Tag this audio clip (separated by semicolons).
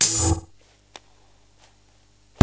amen; breakbeat; breakcore; breaks; dragon; dungeons; idm; medieval; medievally; rough